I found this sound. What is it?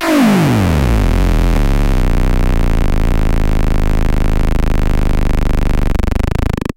Recorded from a simple battery toy, were the pitch resistor is replaced by a kiwi!
From an Emmanuel Rébus idea, with Antoine Bonnet.